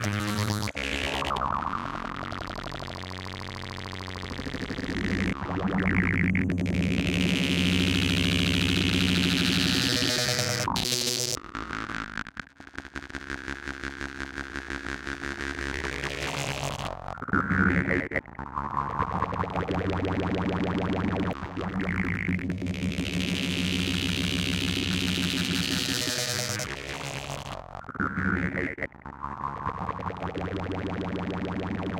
grainulated awesomeness
awesome synth fart i made with granuizing a bass sound
grains, granular, bass, synth, evolving, morhing, fart